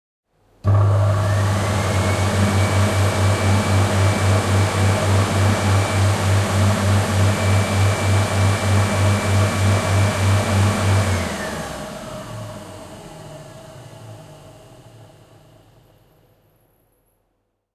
A hand dryer in a restroom, somewhere in GB. Microphone is stationary. More low frequencies.